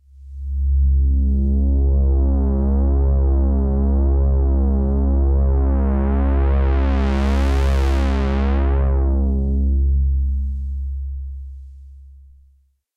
Pulse made on Roland Juno 60 Synthesizer
80s, effect, Juno-60, pulse, sci-fi, synth